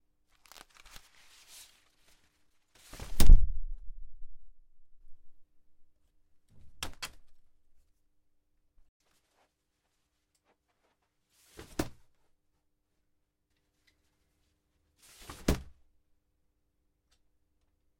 The process of opening and closing an umbrella.
Closing, Opening, OWI